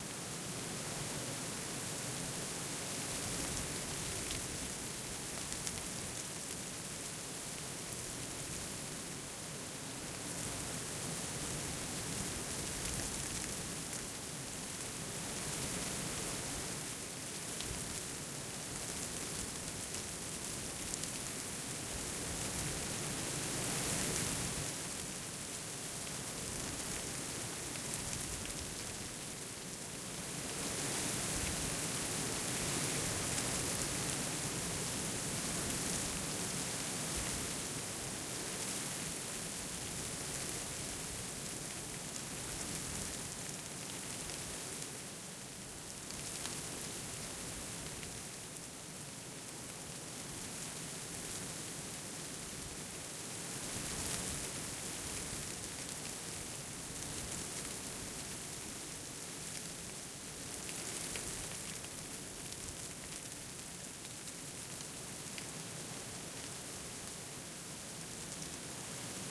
wind medium brisk breeze through stiff dry corn stalks or grass gusty rustling
breeze, grass, gusty, or, rustle, stiff, through